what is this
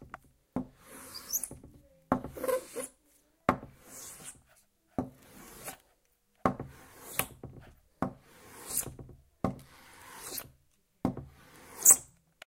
SonicSnaps MB Jari2
mobi, cityrings, belgium, sonicsnaps, soundscape